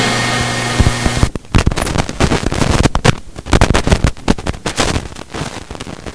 the sound my 4 track makes when you turn it off.